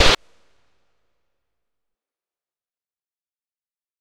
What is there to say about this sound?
batterie 1 - noise burst
BATTERIE 01 PACK is a series of mainly soft drum sounds distilled from a home recording with my zoom H4 recorder. The description of the sounds is in the name. Created with Native Instruments Battery 3 within Cubase 5.